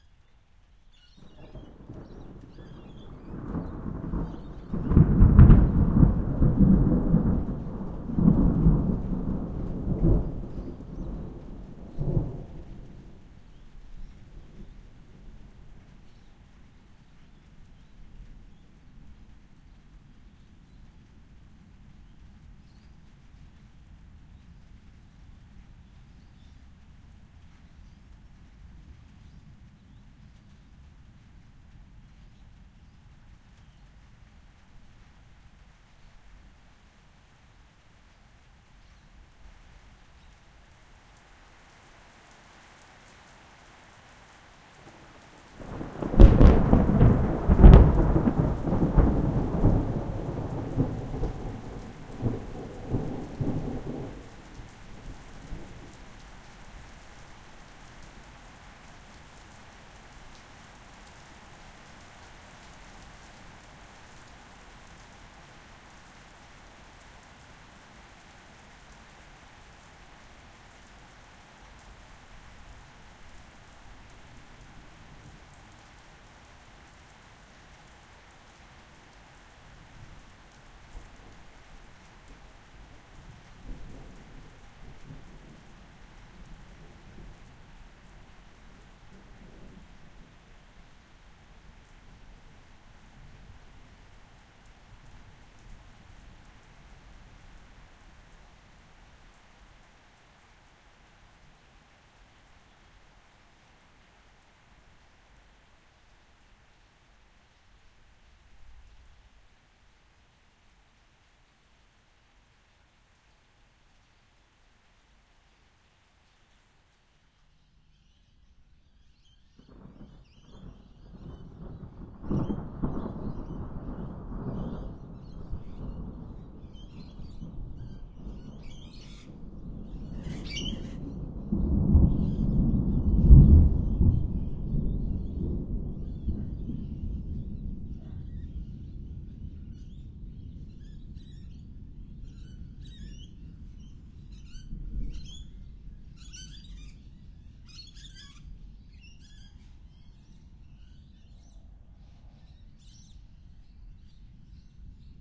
Compiled thunder from other recordings in the last session. I love it when it rains and thunders. Recorded from my back yard (under a veranda). The sounds of parrots and other birds can be heard as the thunder storm rolls in. Recording chain: AT3032 stereo mics (50 cm spacing) - Edirol R44 (digital recorder).